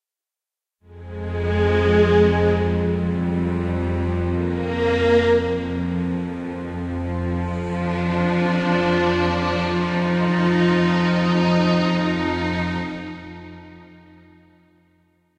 cinematic vio5
made with vst instruments by Hörspiel-Werkstatt Bad Hersfeld
ambience, ambient, atmosphere, background, background-sound, cinematic, dark, deep, drama, dramatic, drone, film, hollywood, horror, mood, movie, music, pad, scary, sci-fi, soundscape, space, spooky, suspense, thrill, thriller, trailer